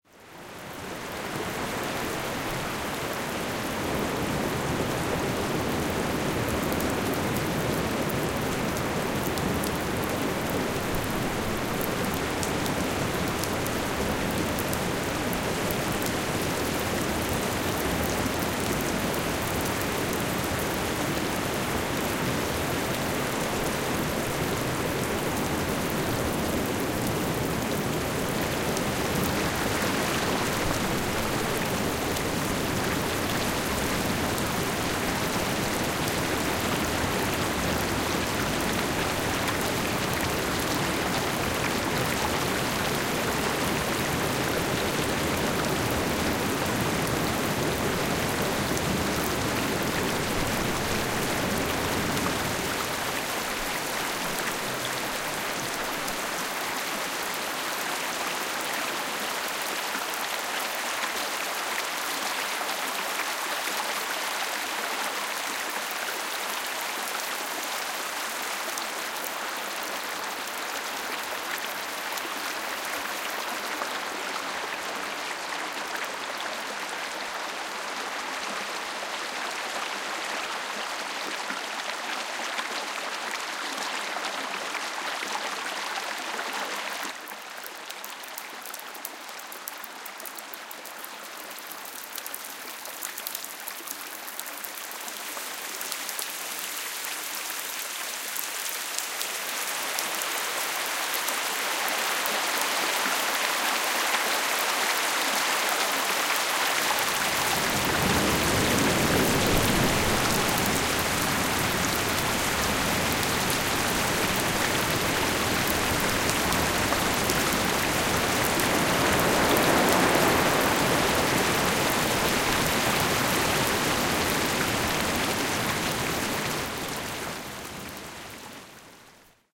Relaxing floating water stream. Dream away with the sound of this stream